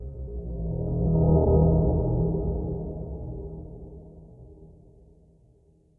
Metal and oxide texture.
texture of metal.